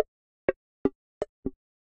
untitled-123bpm-loop4-dry
Pieces of a track I never finished, without the beat. Atmospheric. 123 beats per minute.
loop, tech, techno, 123bpm, minimal